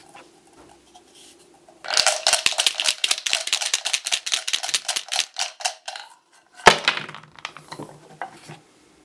Cachos y dados
The sounds of dices in a leather cup
cacho, dices